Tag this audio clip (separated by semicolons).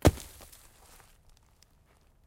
hit; dirt; dust; gravel; impact